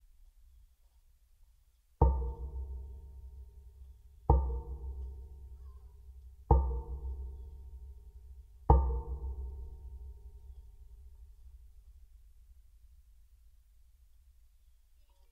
Hollow Stone Step
A Korg clip contact mic attached to a stone step that had been lifted by the ground shifting to expose a hollow space in the step underneath. The lifted step is hit with a yarn mallet repeatedly.
bass, contact-mic, drum, drums, field-recording, hollow, mallet-hit, percussion, percussive, stone